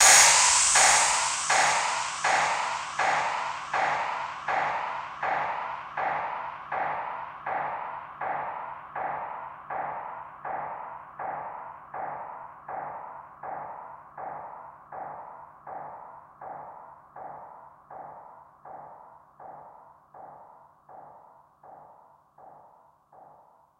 long delayed hit
reggae, delay, send, dub, return, echo, 20-seconds